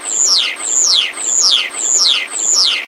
An alarm-like sound I made using a Starling call, just looping and changing pitch/tempo (see a nearby sample for the original sound). You know that Hitchcocks's movie don't you?, birds are sinister creepy creatures!